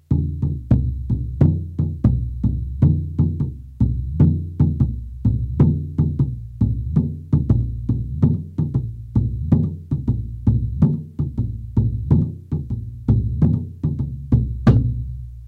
short rhytm and drum bits. Good to have in your toolbox.
bits, fragments, lumps, music, toolbox